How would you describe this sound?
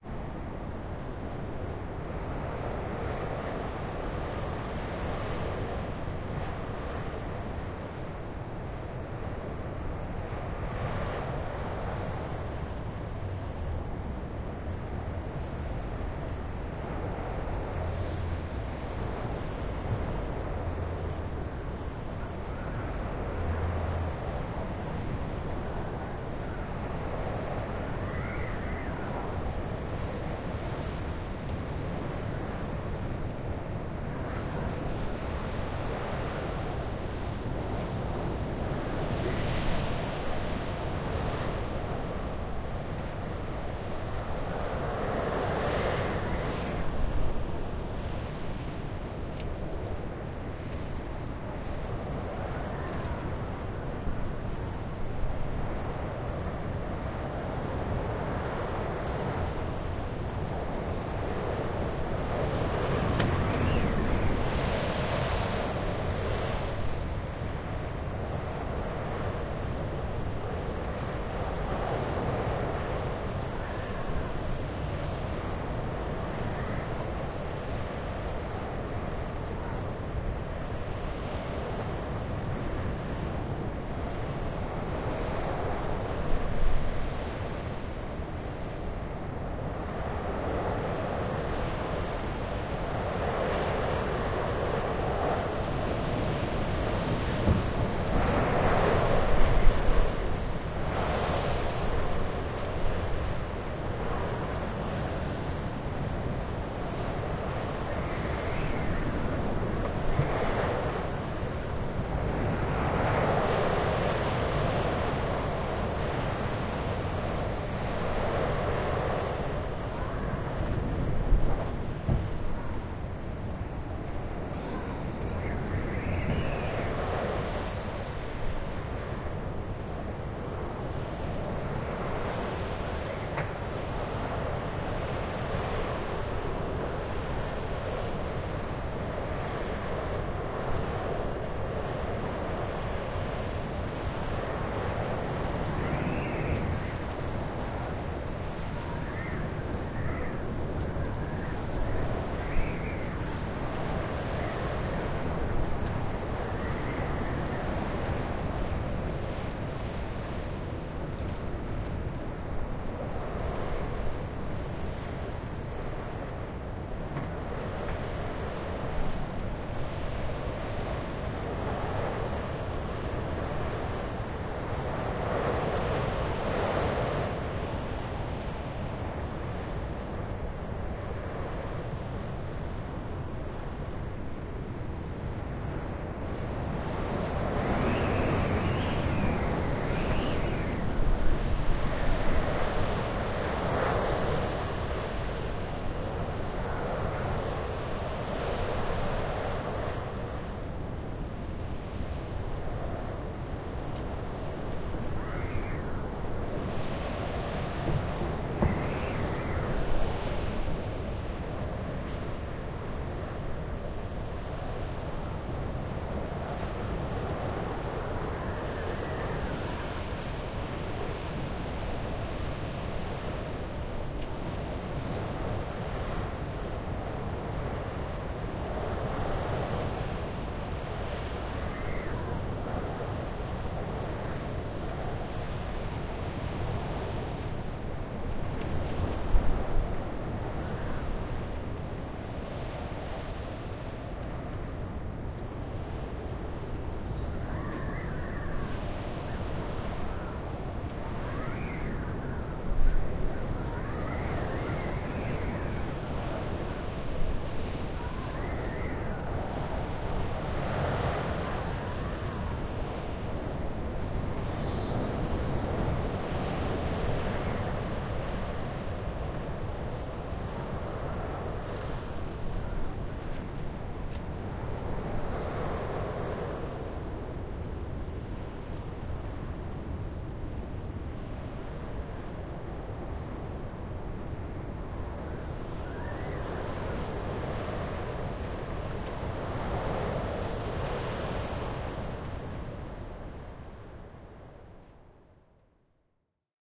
Ambisonics (surround) field recording at Polleide at the Sotra Island west of Bergen, Norway, on Christmas Day 2011 as the hurricane Dagmar is approaching. The epicenter of the hurricane hit the coast further north causing substantial damages.
This file has been uploaded in three versions: 4-channel ambisonic B-format, binaural decoding using KEMAR HRTF, and a regular stereo decoding. This is the stereo version.
Equipment: SoundField SPS200, Tascam DR640. Decoding is done using the Harpex plugin.